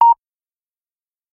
This beep is comming out from substractor on propellerhead reason.
news, short, beep, radio